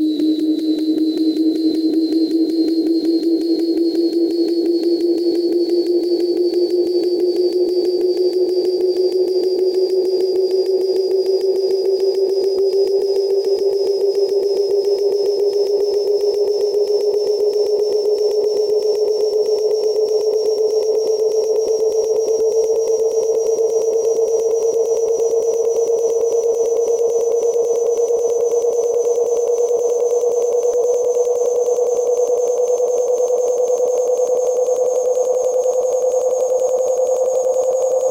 Made using "Wave sample 1" by Audacity program